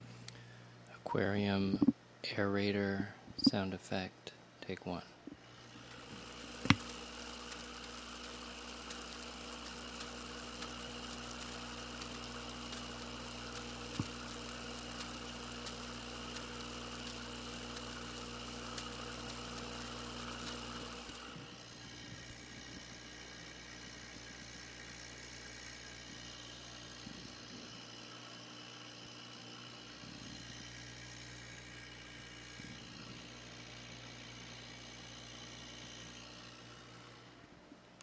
Aquarium aerator y bubbles
Aerator in 10 gallon aquarium and bubbles in water. Mechanical sounds. NTG-2, Tascam DR-60D
aerator, aquarium-aerator, bubbles, fish, pump